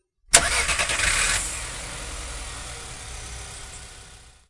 You can hear the sound that a car engine makes when it starts. It has been recorded inside the car with the windows raised. This sound has been equalized with 20 classic V effect.
sound, vehicle, engine, car